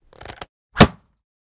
Regular wooden bow